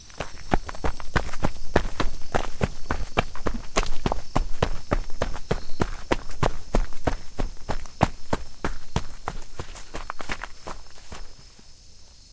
running in fall forest
footstep, running, hurry, footsteps, run, forest, loop, steps, foot